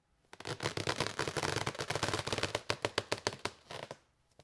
cartoon strech
cartoon style streching/straining sound